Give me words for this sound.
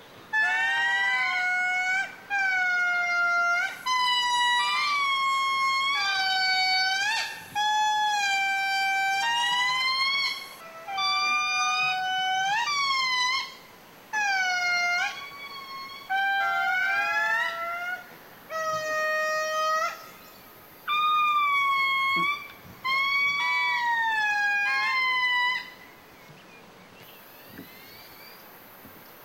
Lemur sfx
Indri lemurs calling. Recorded in the field in Madagascar.
Lemur, Indri, Madagascar